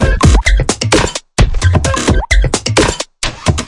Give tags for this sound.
130bpm; beat; drum; drumloop; glitch; hip; hop; loop; pack; trip

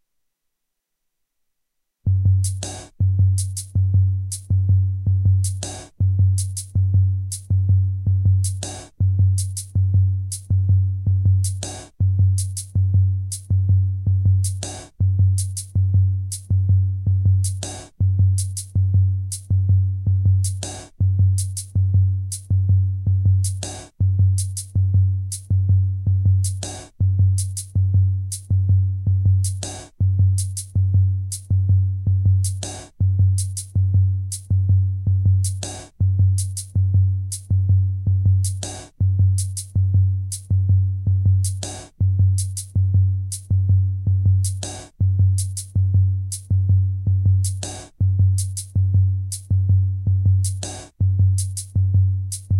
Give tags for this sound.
beat; dark; loop

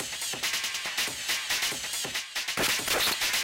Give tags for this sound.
techno; glitch; breakcore; glitchbreak; freaky

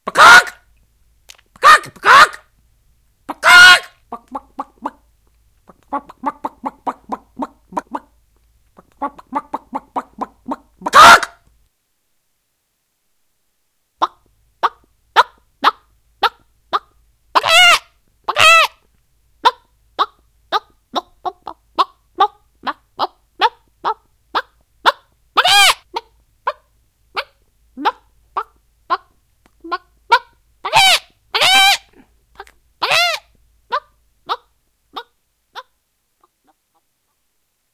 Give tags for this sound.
female; clucking; chicken; imitation; male; ba-gok; vocal; man; woman; cluck; chickens

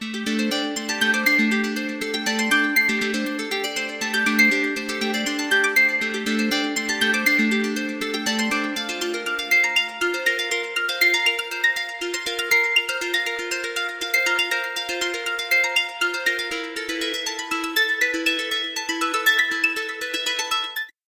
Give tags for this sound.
random; sequence; synth

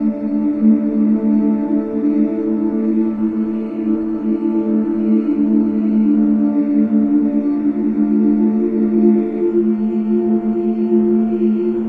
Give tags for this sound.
cinematic; creepy; dark; disoriented; dream; haunted; horror; nightmare; scary; sinister; suspense; thriller

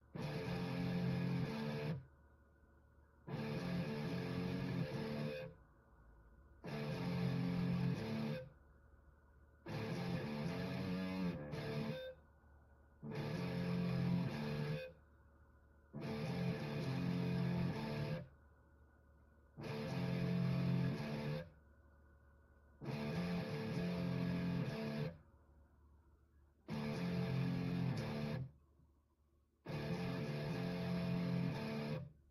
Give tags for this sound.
creepy; game-music; guitar-music; hardcore-rock; heavy-metal; scary

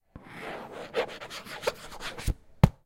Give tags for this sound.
desktop; field-recording

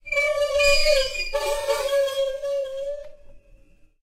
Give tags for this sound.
whining; squeak; rusted; MTC500-M002-s13; swivel; timestretch

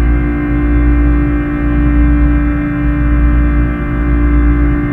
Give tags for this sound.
Background; Sound-Effect; Freeze; Everlasting; Atmospheric; Still; Soundscape; Perpetual

